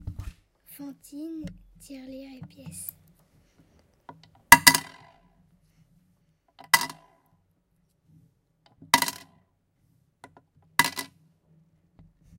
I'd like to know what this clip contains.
Fantine-tirelire et pièces
saint-guinoux, France